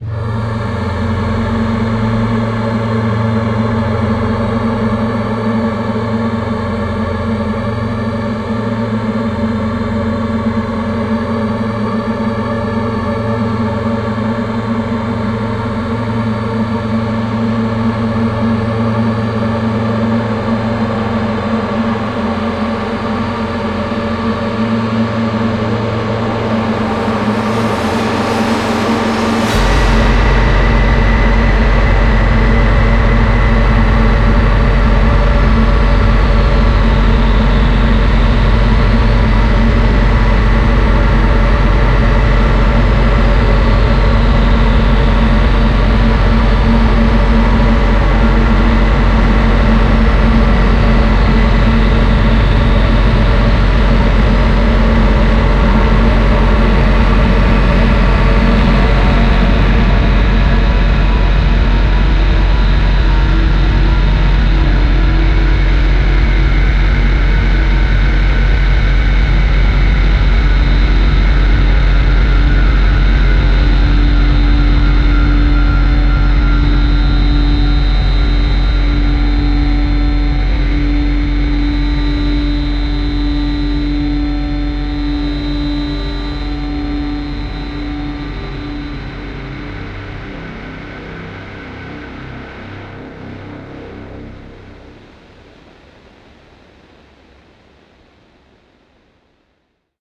The final boss of 2020.
Brand new content after years of not bringing brand new content! This one is entirely done on software.
Plugins used for creation:
NI Kontakt 5 with Stigma by Sampletraxx, Pain Piano by Silence+Other Sounds, Chillerscapes free library by Resomonics, NI Massive, etc.
Effects: iZotope mastering plugins, Berzerk Distortion, Defacer, Guitar Rig 5, Raum, Eventide Black Hole, XCTR, stock FL Studio Reverbs and EQ's, etc.